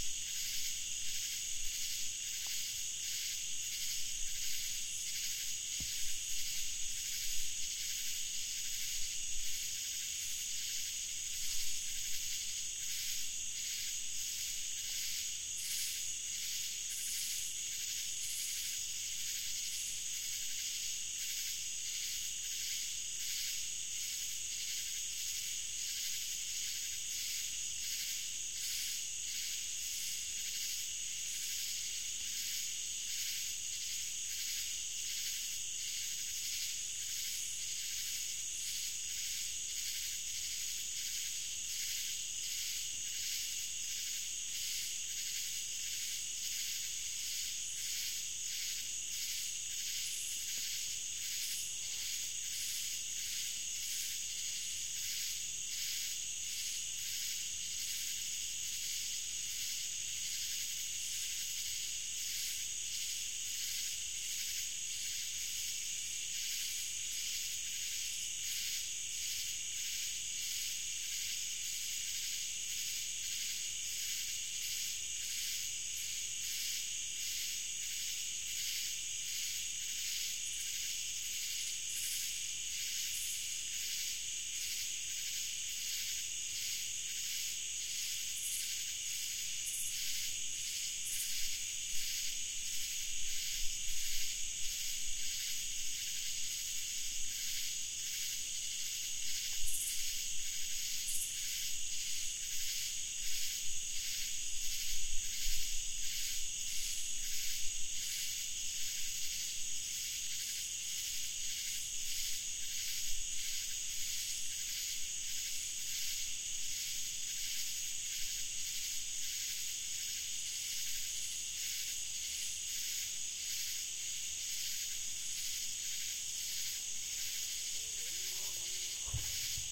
Forest at Night Ambience
A Tascam DR-40X was used. Enjoy!